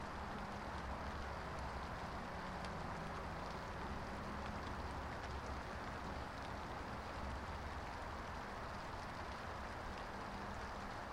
Light rain near a highway